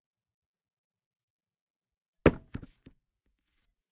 gun being dropped on to a hard surface